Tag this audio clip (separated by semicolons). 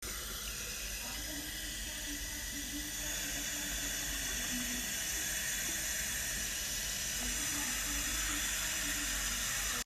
Falling; Liquid; Sink; Water